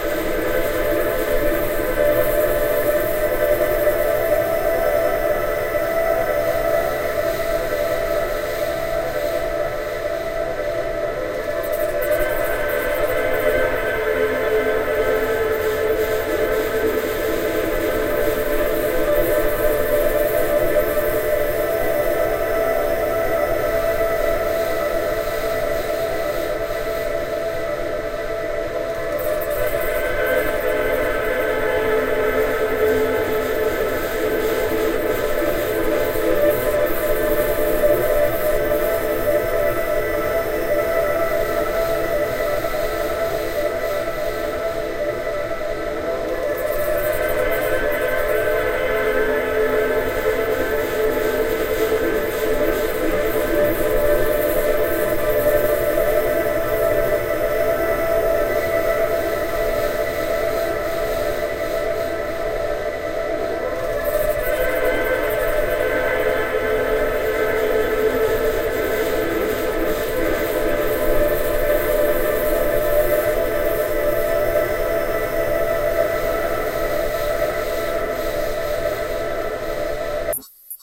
Granular Trumpet
Ambient, Granular, Soundscape